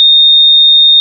1000 ms of 3675 Hz.
Made in Audacity using Tone...
Sound ID is: 594161
3675Hz, second, sine, sine-wave